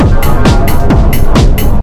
its a loop.
made with reaktor ensemble sofa.
greetings from berlin city!
drums, tekno, trance, elektro, sequence, drumloop